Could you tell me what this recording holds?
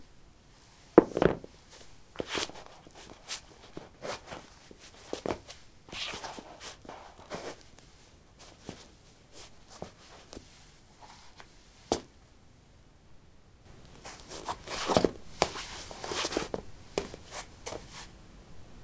lino 06d ballerinas onoff
Putting ballerinas on/off on linoleum. Recorded with a ZOOM H2 in a basement of a house, normalized with Audacity.
footstep, footsteps, step, steps